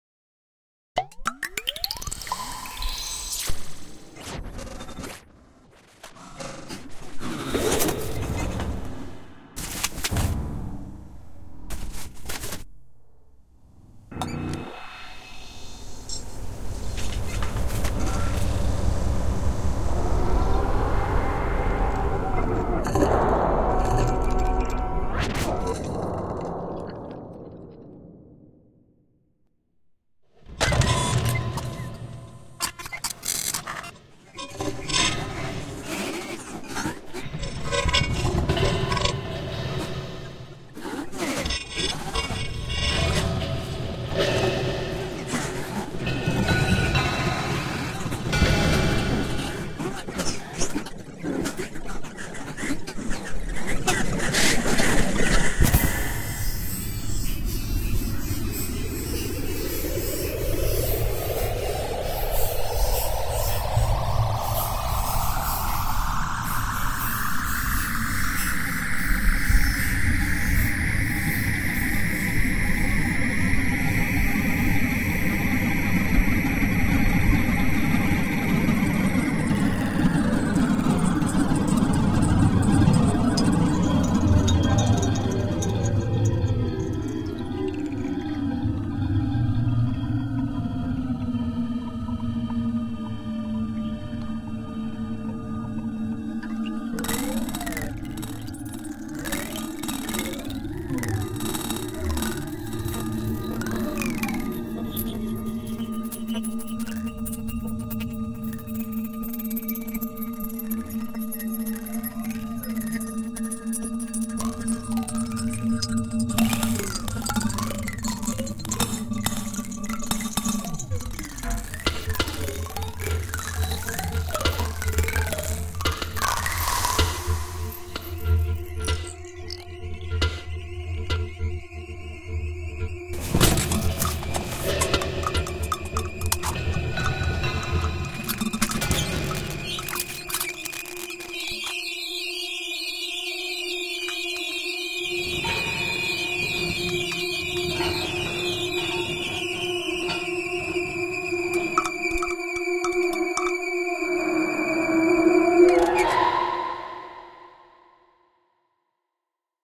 testing ubuntu-studio.
mini, musique, concrete